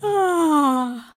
Bocejo Feminino
O som representa uma mulher bocejando, e foi gravado com um microfone Condensador AKG C414
uam, corporal, female